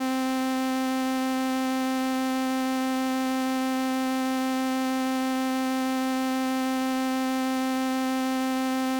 Transistor Organ Violin - C4
Sample of an old combo organ set to its "Violin" setting.
Recorded with a DI-Box and a RME Babyface using Cubase.
Have fun!